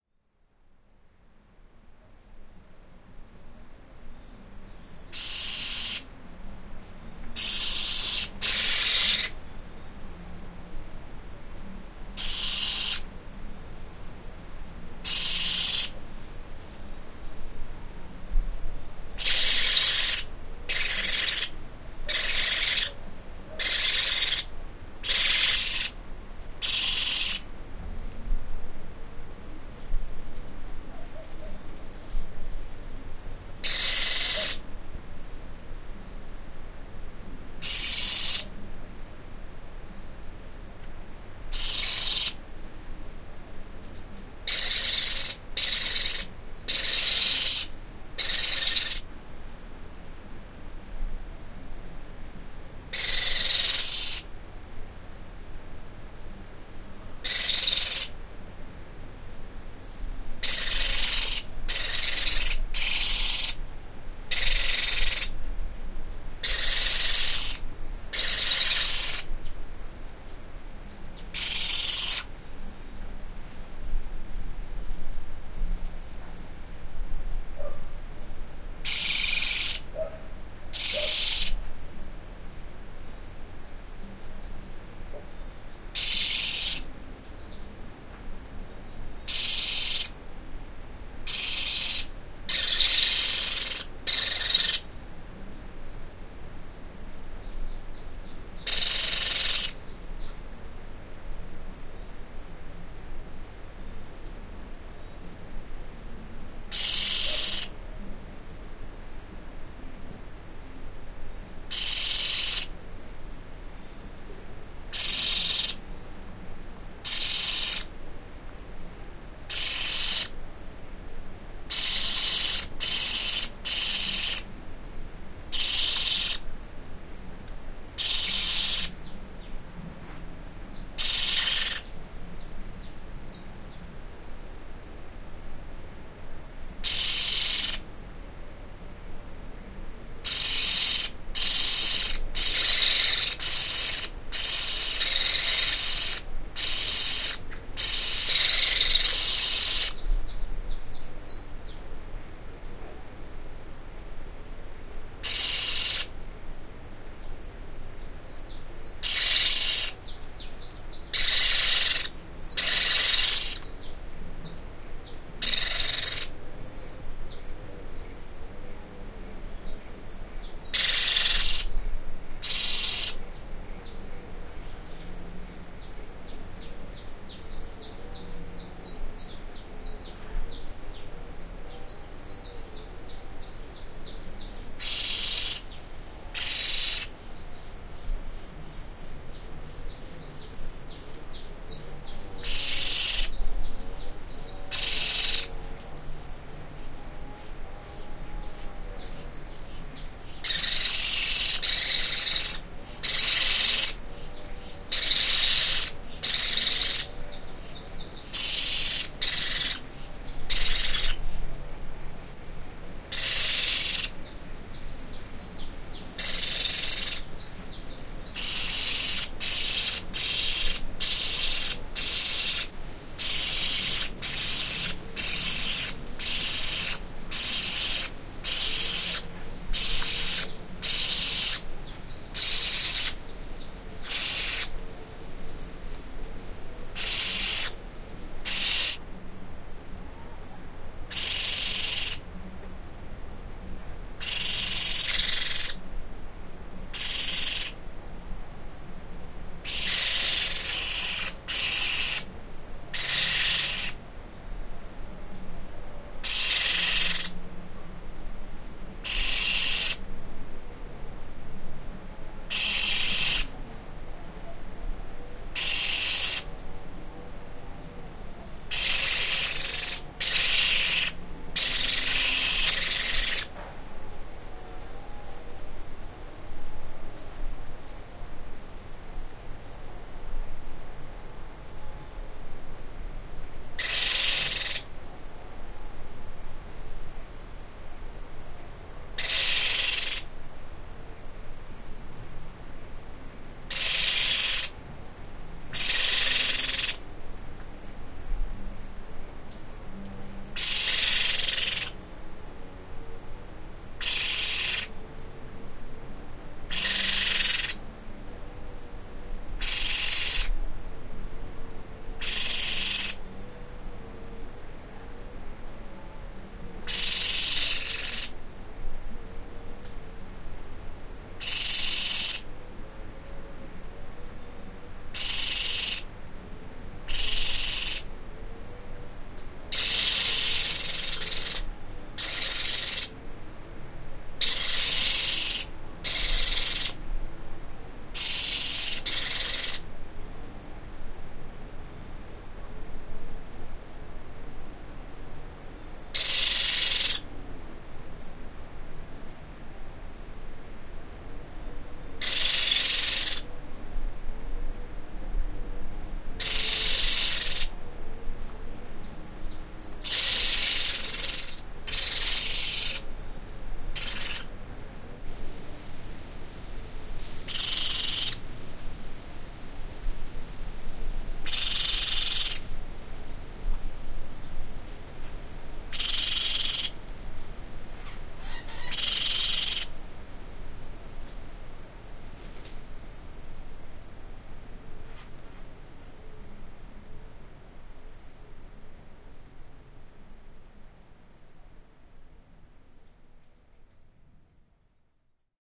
2 baby pionus (parrots) screaming on the roof in the interior of Minas Gerais, Brazil.
Twin sound: neighing horse